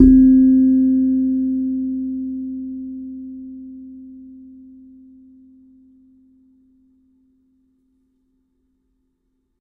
Sansula 02 C' [RAW]
Nine raw and dirty samples of my lovely Hokema Sansula.
Probably used the Rode NT5 microphone.
Recorded in an untreated room..
Captured straight into NI's Maschine.
Enjoy!!!
acoustic, kalimba, mbira, metal, note, one-shot, percussion, raw, recording, sample, sample-pack, sampling, sansula, simple, single-note, thumb-piano, tine, tines